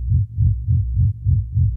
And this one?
spaceship engine rumble loop norm
a synthesised loop that could be used as a futuristic engine noise ??
engine, loop, low, rumble, synthetic